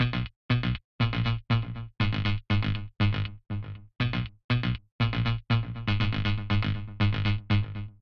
bass f e dd 120bpm delay-03
bass, club, compressed, dance, distorted, dub-step, effect, electro, electronic, fx, house, loop, rave, synth, techno, trance